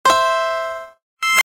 Pick up
sound effect i made from an ipad app
effects, funny, games, sfx, sound